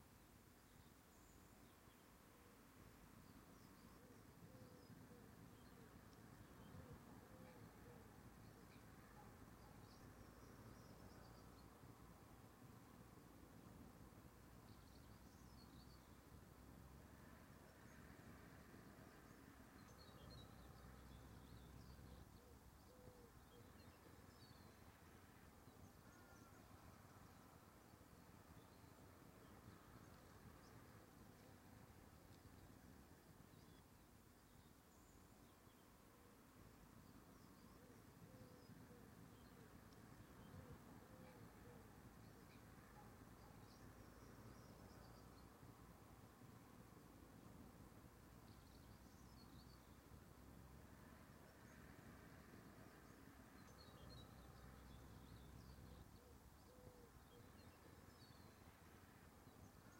PONTECESO MARSH AB 2M
Short recordings made in an emblematic stretch of Galician coastline located in the province of A Coruña (Spain):The Coast of Dead
nature, river, marsh